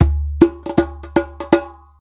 Djembe Loop 10 - 120 BPM
A djembe loop recorded with the sm57 microphone.